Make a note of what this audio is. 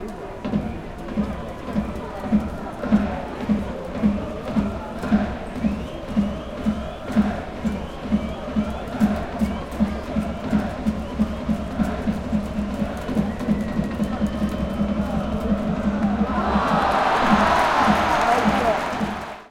nagoya-baseballregion 15
Nagoya Dome 14.07.2013, baseball match Dragons vs Giants. Recorded with internal mics of a Sony PCM-M10
Baseball, Crowd